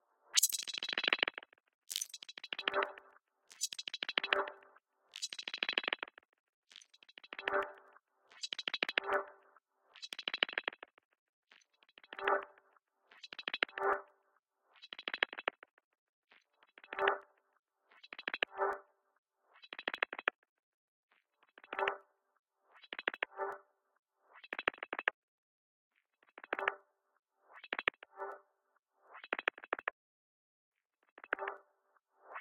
One in a series of somewhat similar sounds created by playing some sounds into a few strange delays and other devices.
ambient, delay, echo, filter, glitch, rhythmic, sound-design, synth